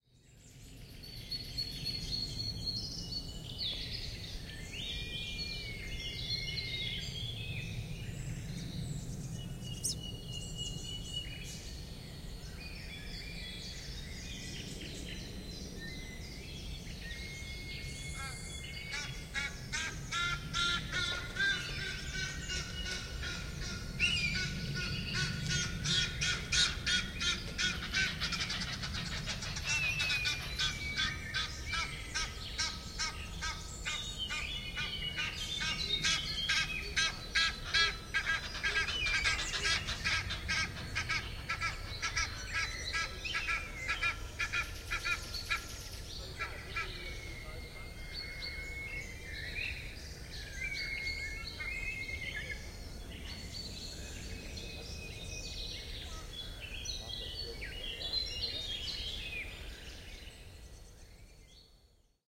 2012 4 13 Red Kite
A flock of crows attacking a couple of Red Kites who are going to build a nest on an tall tree in a forest north of Cologne. Calls of the crows and the excited Kites. Marantz PMD671, Vivanco EM35 on parabolic shield.
predator-bird, birdsong, ravener, raptorial-bird, raptor, crow, kite, bird-of-prey